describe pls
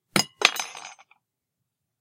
small metal object fall
the sound of a grenade switch falling. it could be used as a knife falling, or as a grenade pin or anything really.
fight sounds recorded for your convenience. they are not the cleanest of audio, but should be usable in a pinch. these are the first folly tests iv ever done, I hope to get better ones to you in the future. but you can use these for anything, even for profit.
army, bomb, bullet, clink, drop, fall, grenade, gun, handgun, knife, metal, military, pistol, rifle, shooting, shot, steel, war, weapon